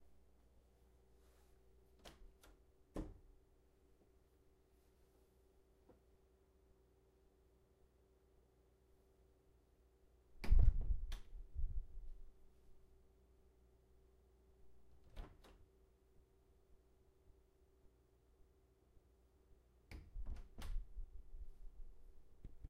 opening and closing a door
wooden, open, door, close